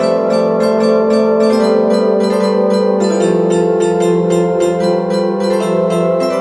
New Orchestra and pad time, theme "Old Time Radio Shows"
ambient, background, oldskool, orchestra, pad, radio, silence, strings